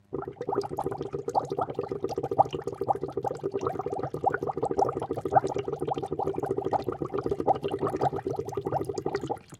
I used:
-Rode M2
-Plastic bucket
-Stainless steel straw
-Focusrite Solo
bubble, burbuja, field-recording, water